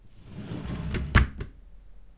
drawer close
Sliding a drawer shut
close, drawer, slide, wood